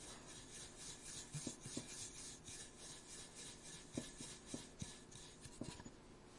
Scissors spinning on a person's finger.